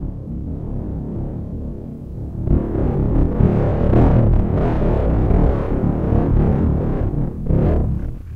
A recording of wind using the Zoom H6 with the included XY mic and a pitch change to try to make it sound more mechanical.